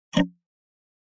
sound var 13
snaree, clap, sfx hit percussion one-shot percs perc percussive
percussive, snaree, percussion, sfx, hit, perc, clap, one-shot, percs